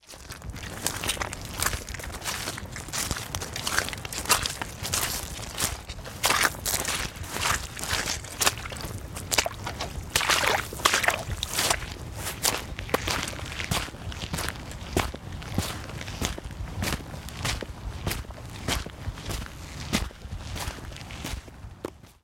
Footsteps Walking Boot Mud to Puddle to Gravel
A selection of short walking boot sounds. Recorded with a Sennheiser MKH416 Shotgun microphone.
footsteps, squelch, mud, foley, wet, puddle, walking, boots, outdoors, sfx, walkingboots